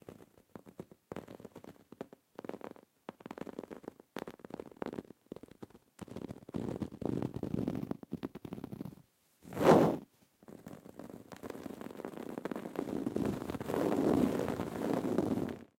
A cat scratching a scratching post